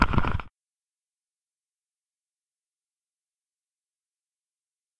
hit-mic03
Something bumping the microphone.
Recorded with a cheap microphone, and cleaned up with Audacity.
click, mic, bump, hit